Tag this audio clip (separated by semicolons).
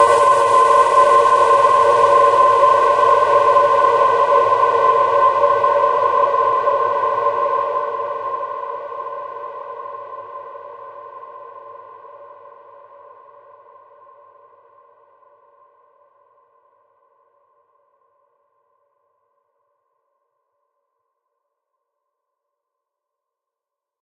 110; acid; blip; bounce; bpm; club; dance; dark; effect; electro; electronic; glitch; glitch-hop; hardcore; house; lead; noise; porn-core; processed; random; rave; resonance; sci-fi; sound; synth; synthesizer; techno; trance